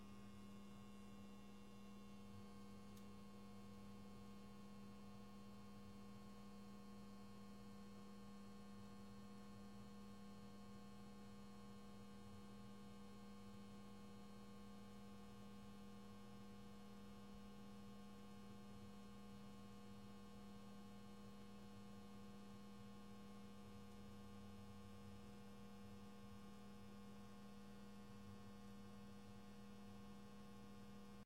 Fluorescent Lightbulb Hum
The hum/buzz of a fluorescent lightbulb.
bulb, fluorescent, foley, hum, light, lightbulb